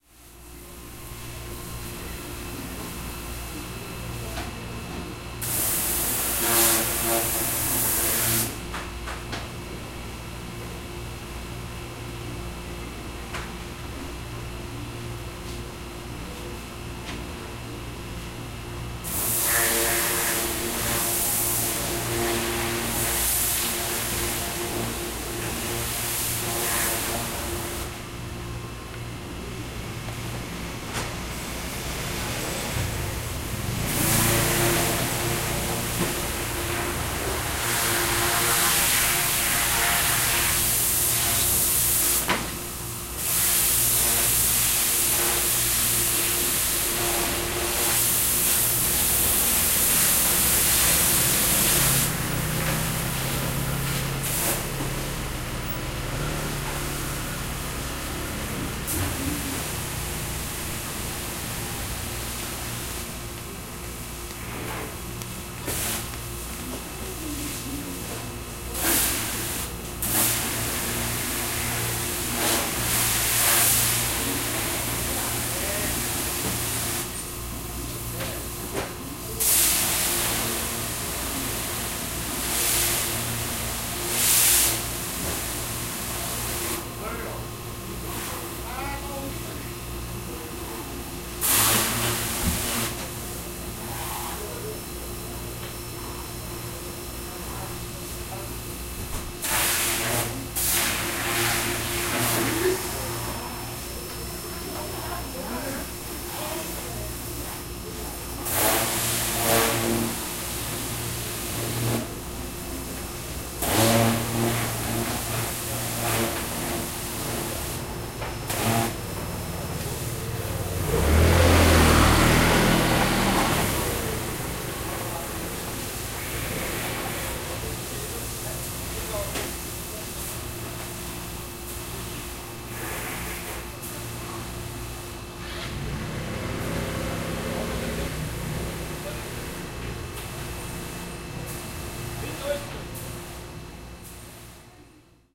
0334 Water machine
Machine with pressure water for cleaning. Motorbike. Jungang Market.
20120629
field-recording, korea, machine, market, seoul, water